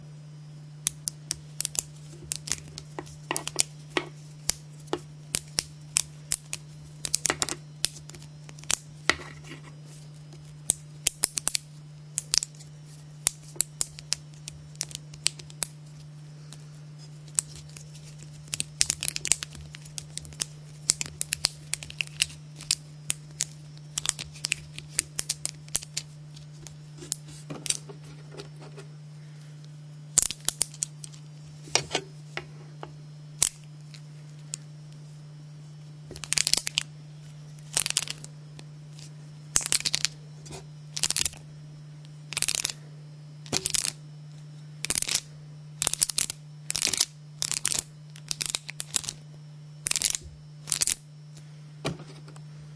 A toy bendy snake thing (sort of like a rubix snake) being twisted and bent to produce clacking noises.
Recorded with a Canon GL-2 internal microphone.
click, request, toy